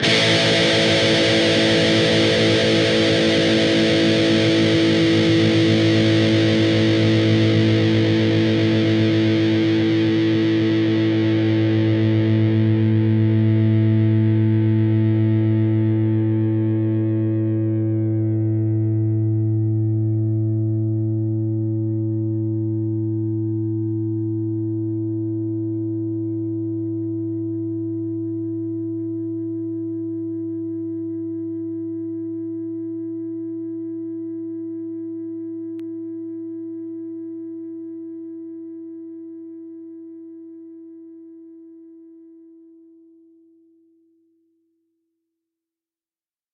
Dist Chr A5th up
Standard A 5th chord. A (5th) string open, D (4th) string 2nd fret, G (3rd) string, 2nd fret. Up strum.
chords, distorted, distorted-guitar, distortion, guitar, guitar-chords, rhythm, rhythm-guitar